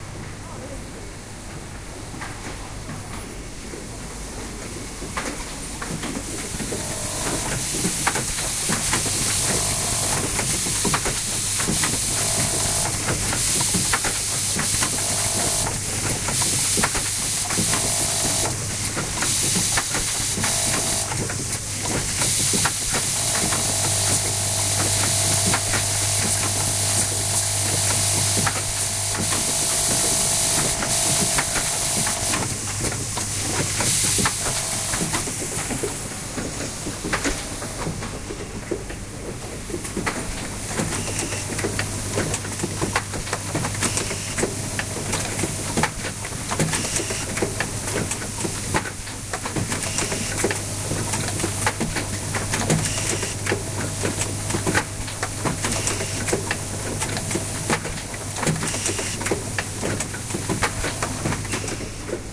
Noise recorded close to industrial assembling machines.